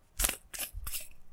Pill bottle screw open
Opening a screwed pill bottle.
pills, pill, container, open, bottle, screw, plastic, shake